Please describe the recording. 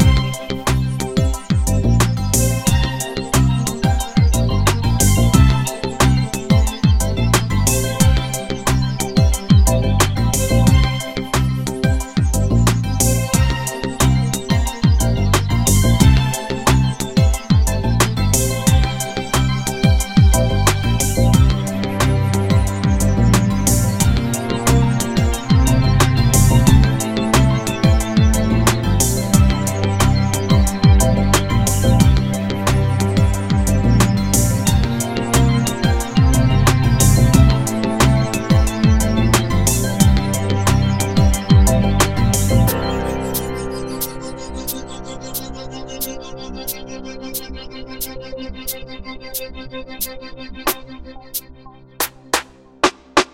Always sunshine
A funny, positive loop.